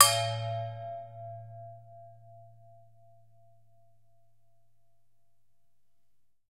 Striking a metal vacuum flask.